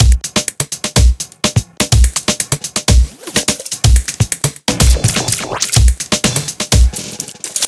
Organic Break 01 (125 bpm loop)
bass, beat, break, drum, drums, dynamic, glitch, high, hop, kick, loop, organic, range, stereo